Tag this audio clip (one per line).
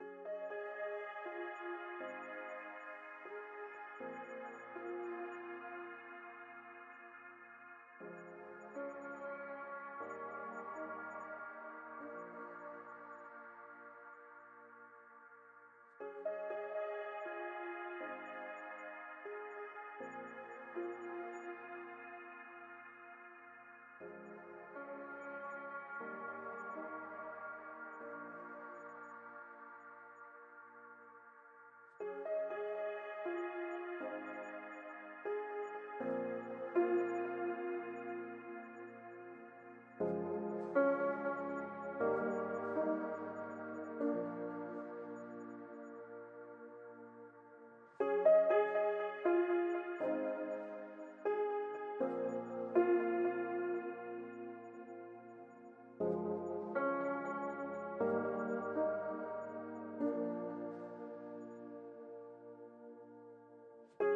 loop
piano
sad